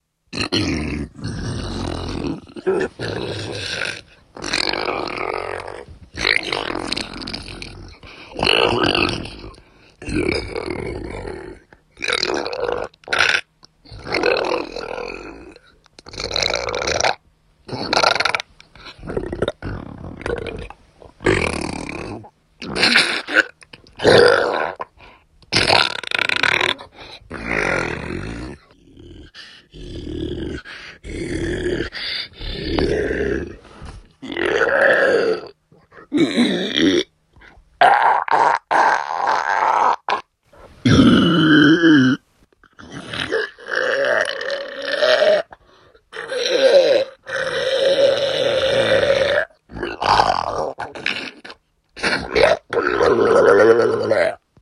horrible gurgling monster
disturbing, creepy, beast